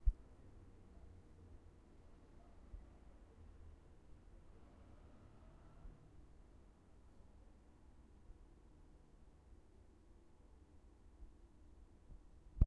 room reverb at night
night, alone